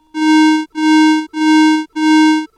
A digitally created, repeating warning signal using AUDACITY software.